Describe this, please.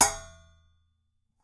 This cymbal was recorded in an old session I found from my time at University. I believe the microphone was a AKG 414. Recorded in a studio environment.